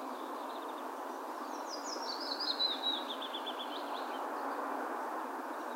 Single song of a Willow Warbler. Recorded with a Zoom H2.